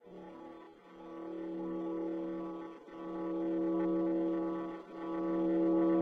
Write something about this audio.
Bell tone generated in CoolEdit. Fade-in, noise reduction added.
sci-fi, fade-in, background, cinematic, processed, bell-tone, effect, bell, fade, synth, pad, spacey